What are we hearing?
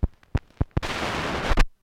The sound of the stylus jumping past the groove hitting the label at the center of the disc.
record, noise